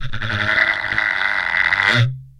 skitter.thrum.05
idiophone, friction, instrument, wood, daxophone